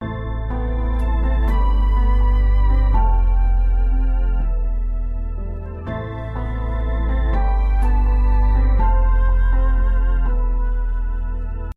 Loopy Sad 6
Needed a good sad track for my videos. I find sad a lot harder to compose than happy. Hopefully it fits, but if it doesn't work for me, maybe it will work for you!